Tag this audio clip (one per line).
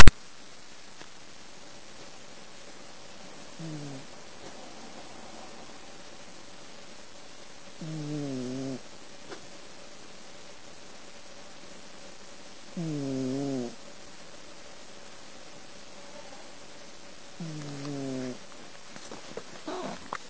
animal
cat
snore